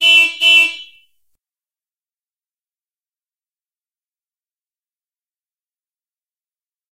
Remix of another sample of a Honda Civic car horn, beeping twice in short succession. Background noise removed. This is a muted version, like you would hear if the car was "offstage."